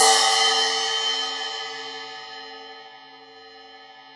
RC13inZZ-Ed~v03
A 1-shot sample taken of a 13-inch diameter Zildjian Z.Custom Bottom Hi-Hat cymbal, recorded with an MXL 603 close-mic and two Peavey electret condenser microphones in an XY pair. This cymbal makes a good ride cymbal for pitched-up drum and bass music. The files are all 200,000 samples in length, and crossfade-looped with the loop range [150,000...199,999]. Just enable looping, set the sample player's sustain parameter to 0% and use the decay and/or release parameter to fade the cymbal out to taste.
Notes for samples in this pack:
Playing style:
Bl = Bell Strike
Bw = Bow Strike
Ed = Edge Strike
1-shot, cymbal, multisample, velocity